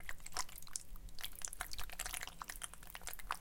The sound of mixing pasta with a wooden spoon. A short extract just in case.
field-recording, acoustique